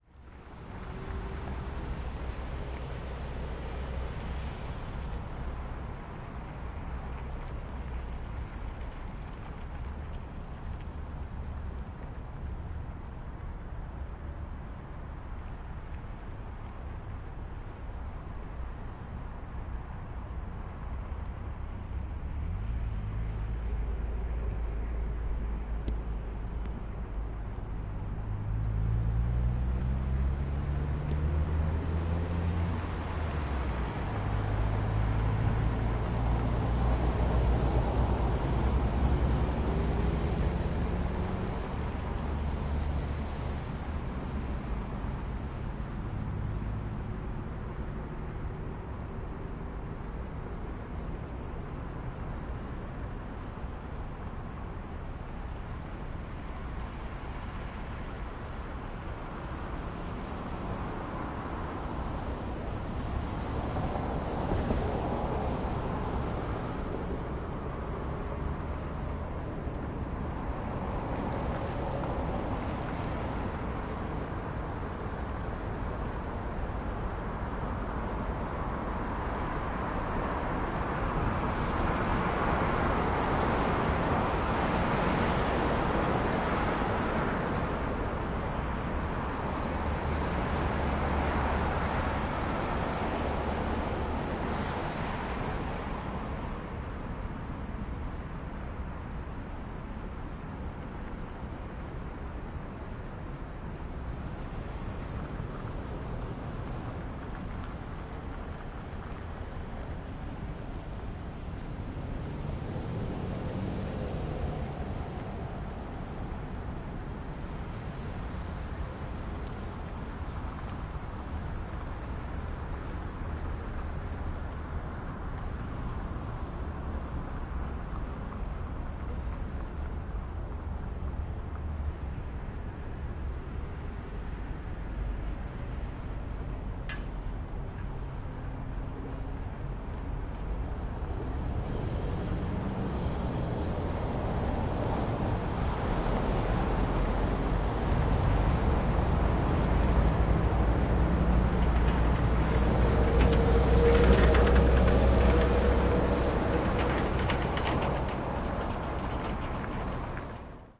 XY City hum Night Light traffic crossroad
Night traffic in the suburbs of Moscow. Car passing, city hum.
Recorded: 2017.03.23
Device: ZOOM H6 (XY mic)
cars, crossroad, street, field-recording, Moscow, night